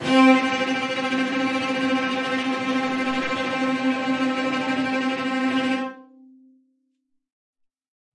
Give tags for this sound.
c4; cello; cello-section; midi-velocity-95; multisample; tremolo

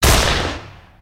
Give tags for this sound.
blackpowder; coach-gun; coachgun; distant; distant-variant; fire; gun; shoot; shotgun; weapon

